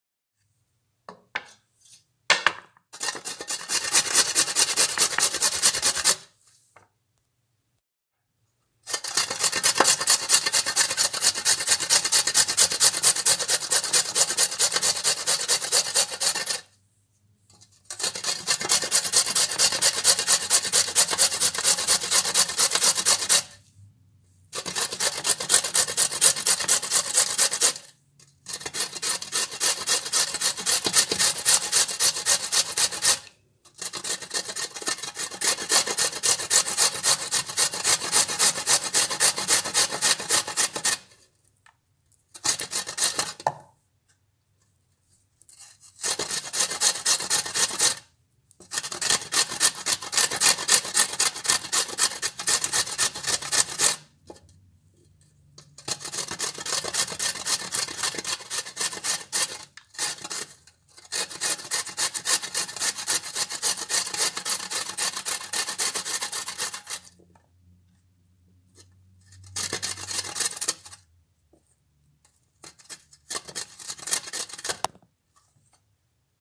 Carrot on grater
me grating a carrot on a wooden cutting board with a metallic cheese grater.
Samsung galaxy microphone.